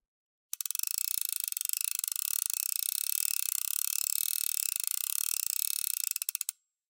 Angel Fly Fish Reel Slow Wind 1
Hardy Angel fly fishing reel winding in slowly